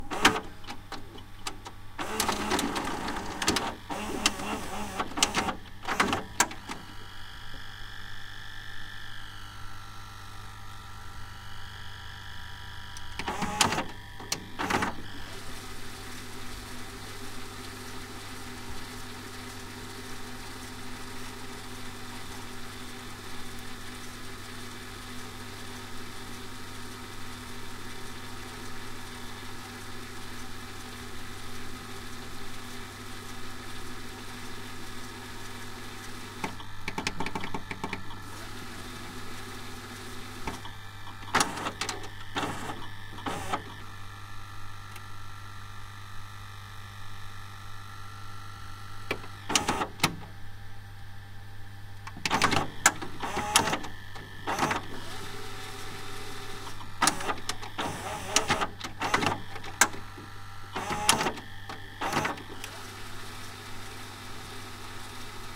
VHS player
Some sounds from a VHS recorder (only playing and rewinding, not recording). Because I also had to press buttons, I did not manage to keep the mic completely stationary. There might also be some background sounds, and of course you can hear the button presses.
data, player, rewind, vhs, video